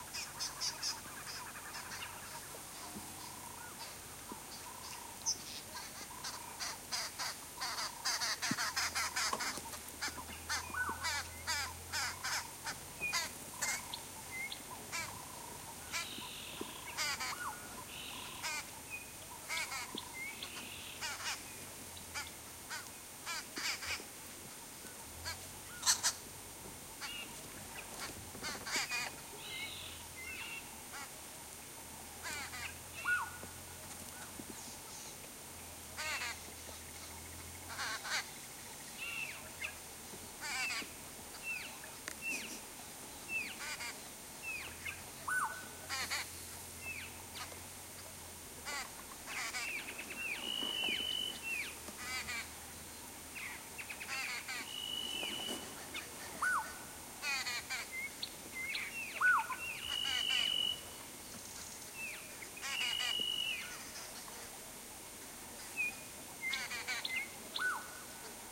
Elephantplains oriole
Morning game drive in Krueger Park, South Africa. Calls of Black-headed Oriole and other bird species.
apart from oriole and cape turtle dove one can hear:
redbilled woodhoopoes (laughing sound)
arrowmarked babblers (harsh sounds)
chinspot batis (3 descending notes)
brubru shrike (like a digital telephone)
africa, birdcall, field-recording, morning, oriole, savanna, south-africa